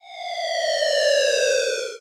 uFO-ish
Falling synth sound. Sounds like a UFO. Created in Audacity.